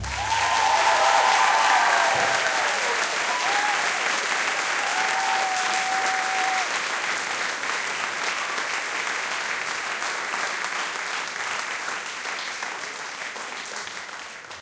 Theatre audience applauding after a song